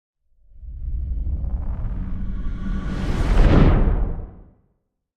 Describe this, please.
Woosh, dark, deep, long.
long, deep